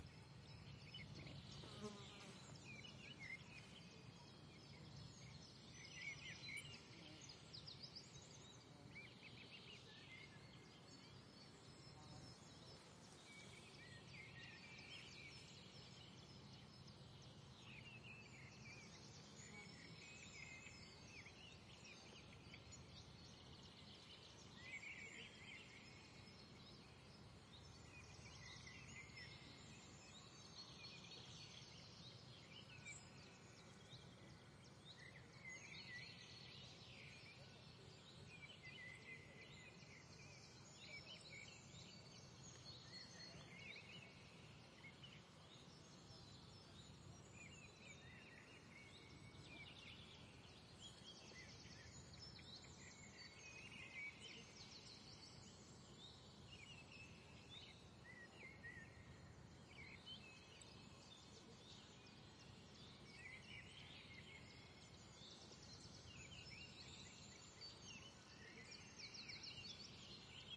Mountain Meadow Switzerland Birds Insects distant cowbells
Field recording of a mountain meadow in Switzerland.
Birdsong, countryside, Meadow, Mountain, nature, peaceful, Switzerland